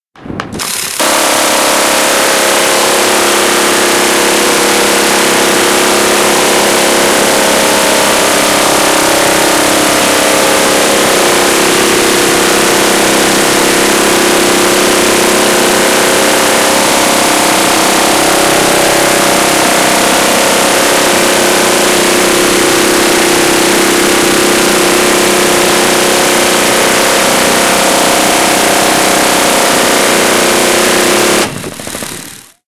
The Garwood M134G Minigun demo by R&R; Exotic Machines. Audio from HDV camera mics, 4 feet from gun.